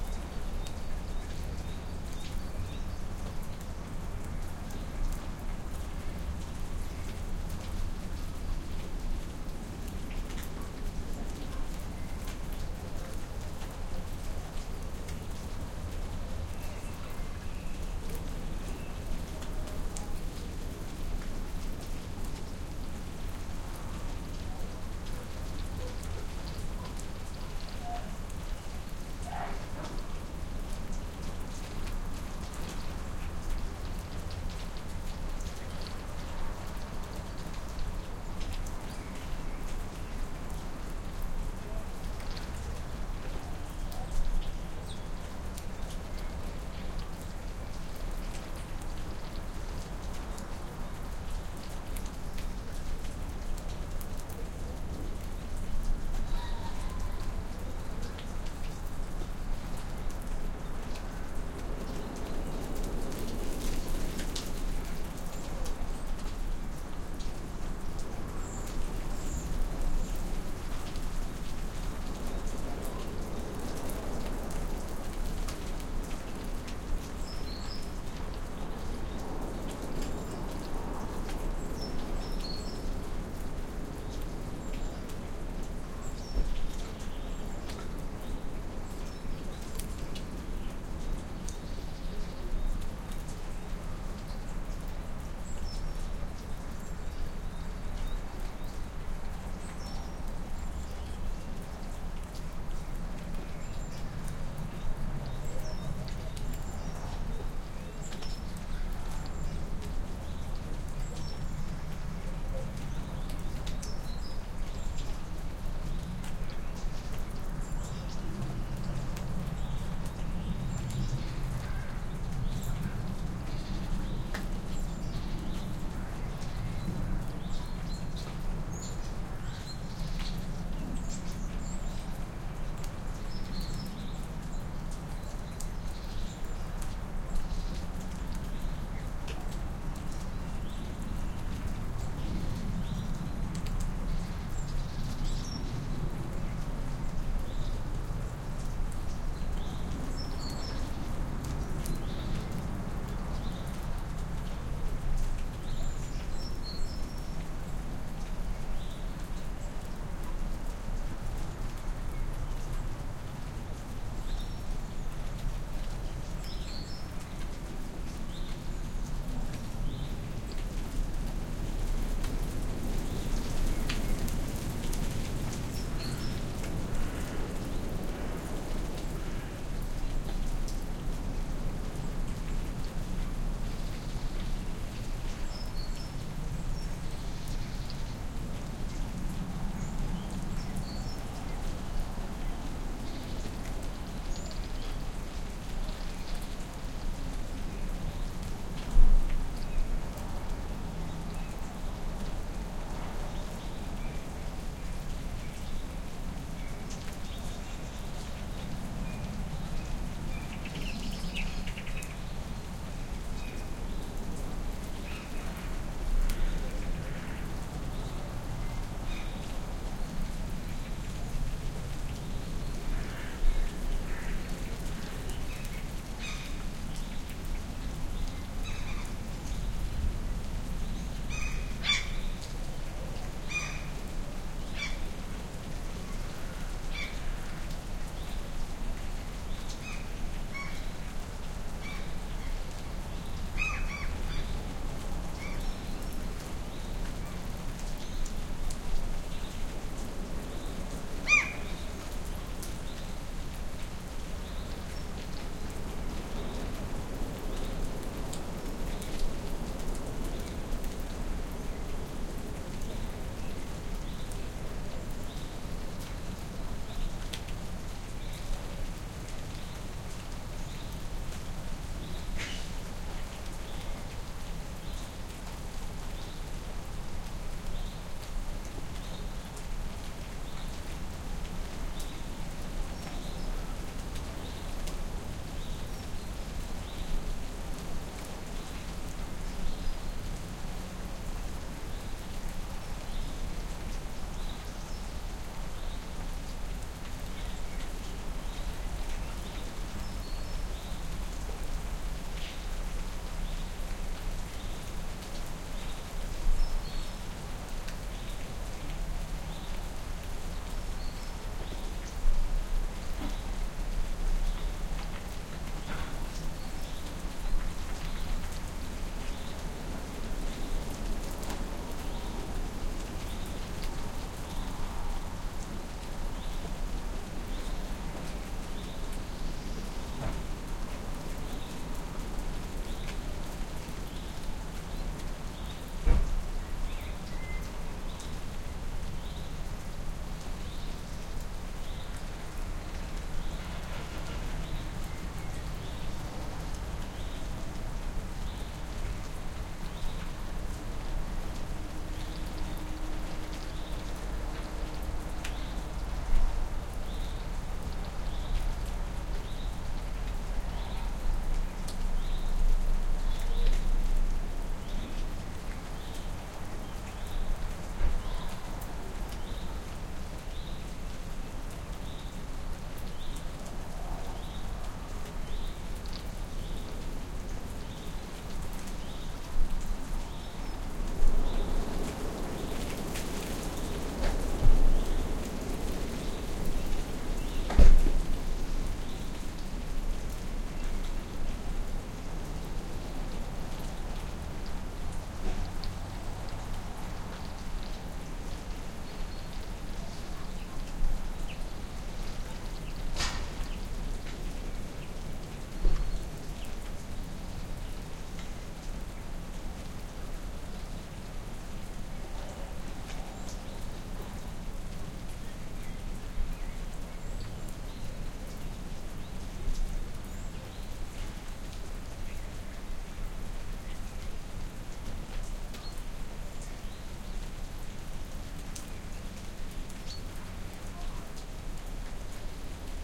rain in the evening
A few minutes of rain and the wind in a birch tree. All that in the evening time in suburbia. Rode NT1a, FP24 in R-09HR.
denmark, evening, field-recording, rain, suburbia, tree, wind